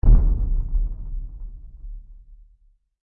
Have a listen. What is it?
far bang
far impact on the ground.
or far drume hit
impact, bang, hits